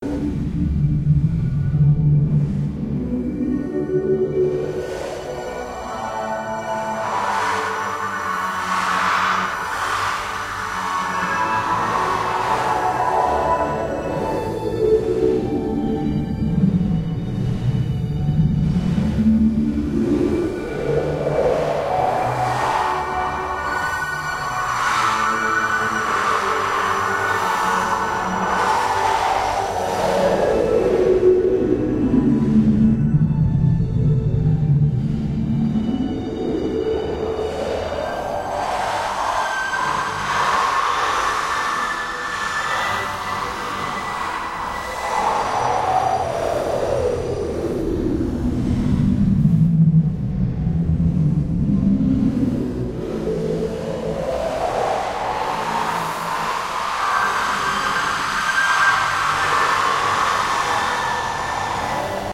Music on the Wind
Ambient acoustic guitar loop with special effects.
Acoustic, ambience, background-sound